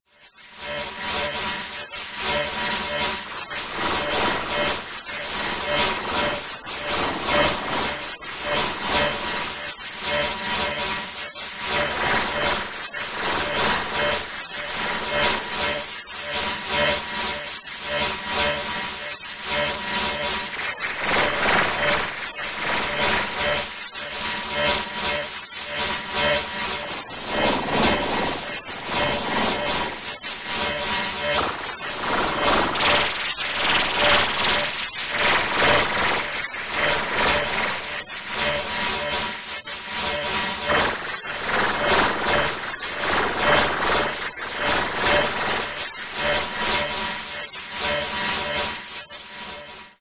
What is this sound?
Machinery BR
Various artificially created machine or machinery sounds.
Made on Knoppix Linux with amSynth, Sine generator, Ladspa and LV2 filters. A Virtual keyboard also used for achieving different tones.
Ambience, Factory, Machine, Machinery, Mechanical, Synthetic